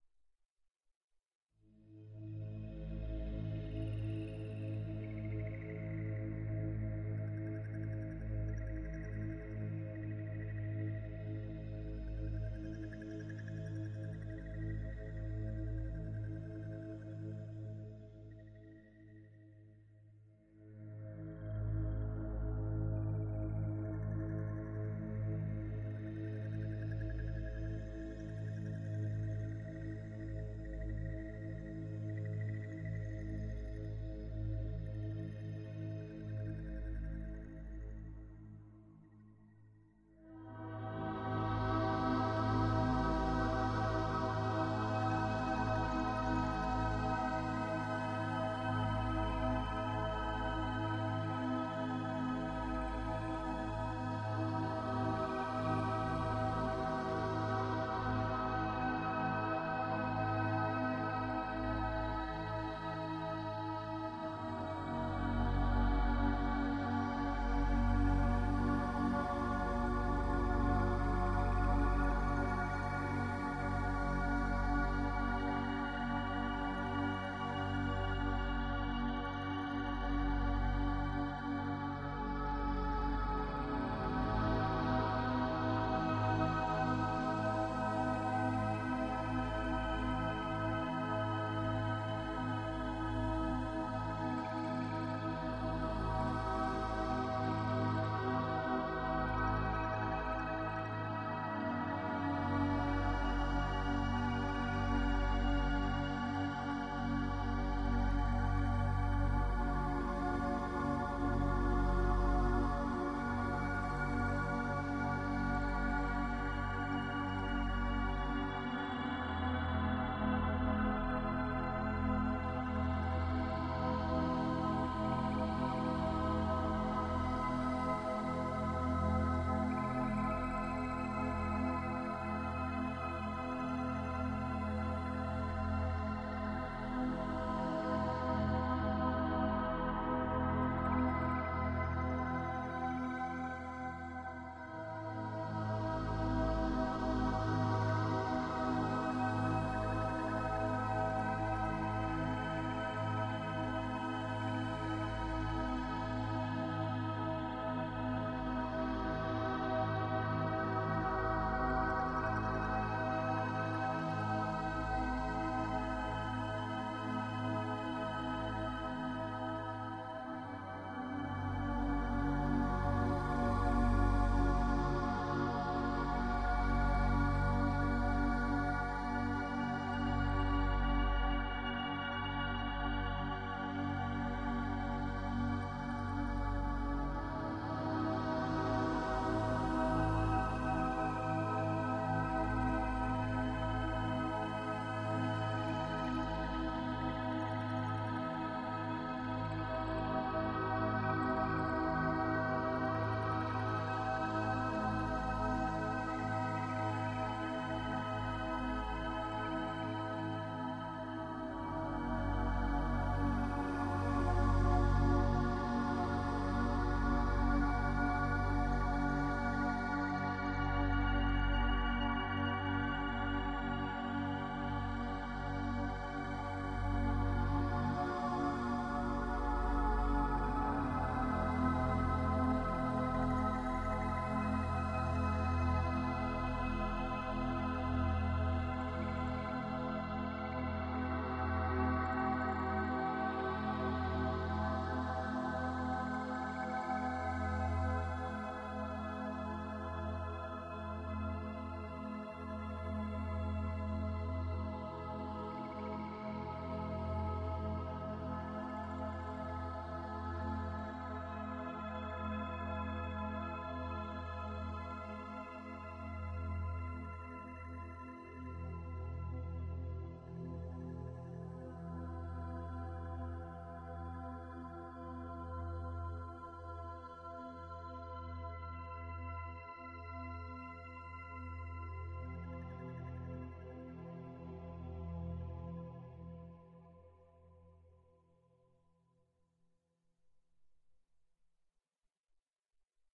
relaxation music #36
Relaxation Music for multiple purposes created by using a synthesizer and recorded with Magix studio.
Like it?